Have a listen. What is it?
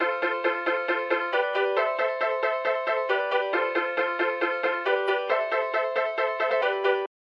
oldskull chords
chord, chords, melodic